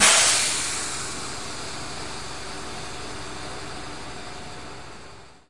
air brakes loud fade out
Truck air brakes being released. Engine idles in background. Recorded with a Tascam DR-44WL.
air-brakes, brakes, car, idling-engine, truck, truck-air-breaks, truck-brakes